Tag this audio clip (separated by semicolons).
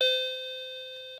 lofi; melody